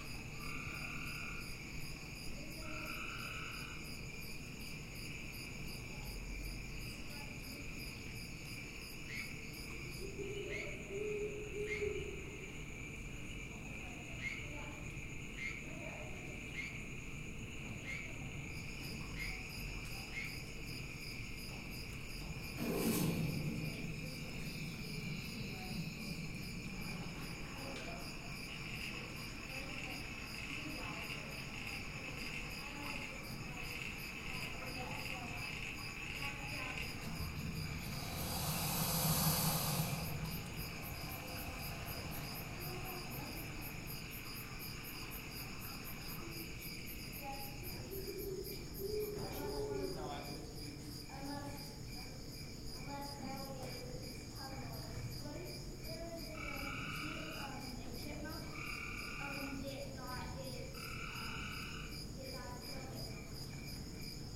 Kingdoms of the Night (The Swamp)
The ambience of the swamp in the Kingdoms of the Night at the Henry-Doorly Zoo.